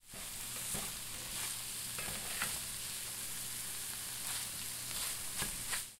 cooking - scrambled eggs
Stirring eggs as they are being scrambled.
butter, cooking, egg, eggs, fry, frying, scrambled, scrambled-eggs, skillet